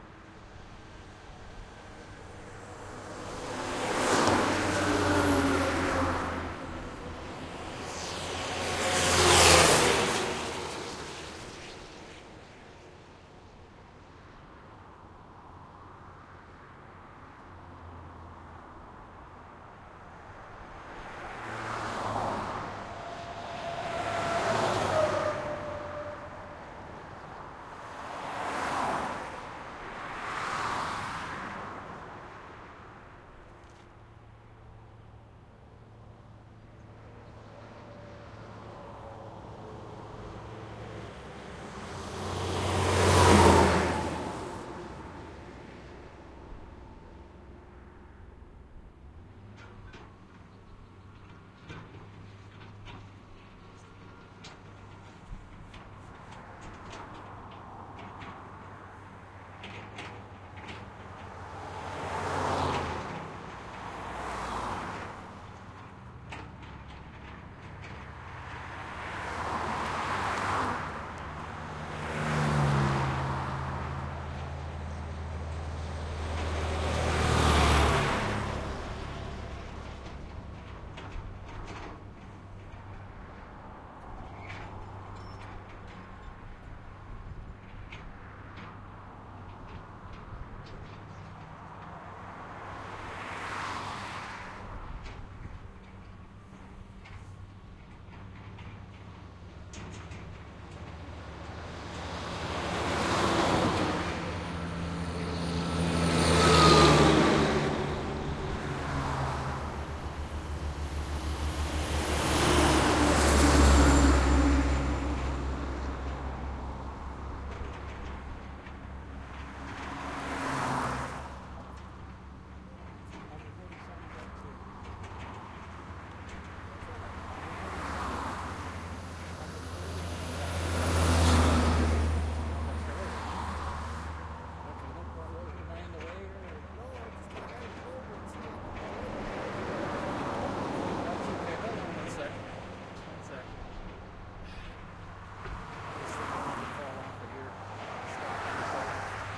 Recorded along the side of Peacekeeper's Way, known locally as the "Sydney Bypass." Includes a few really nice big trucks passing in the first half of the recording. Throughout the recording, you can occasionally hear a tractor's frame rattling and faint reverse "beep beep" sound; there was construction being done behind me at the time.
At the very end, you can hear me talking to one of the construction workers as they come up to me to ask what I was doing squatting down behind the highway guardrail like a wingnut... ;) That's why I created the second recording, "Highway 2," but I decided to provide this one as well because of those nice big trucks passing in this one.
ambiance, ambient, car, cars, city, driving, field-recording, freeway, highway, motorway, noise, road, street, traffic, truck, trucks, urban